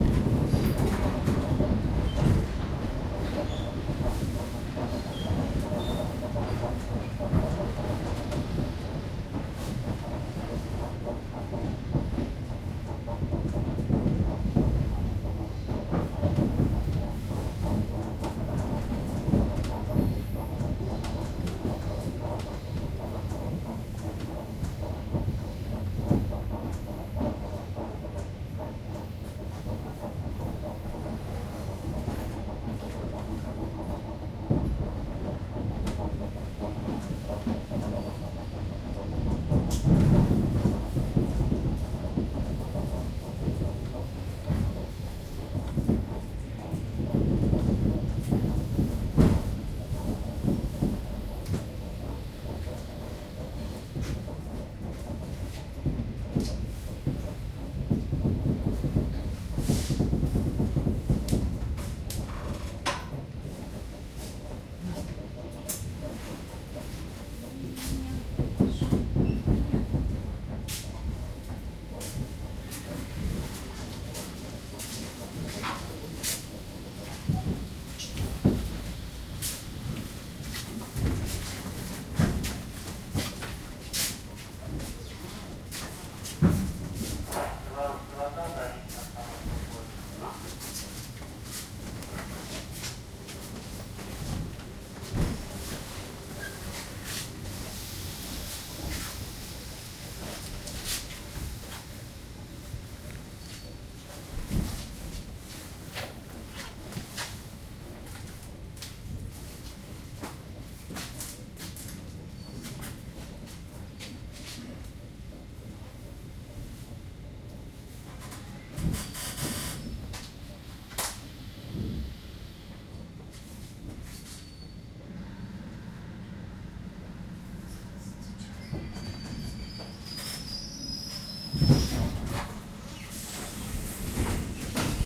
Moscow suburban train Belorusskiy
A suburban train pulling into Belorusskiy station in Moscow. Zoom H1
Belorusskiy, field-recording, Moscow, suburban, train, travel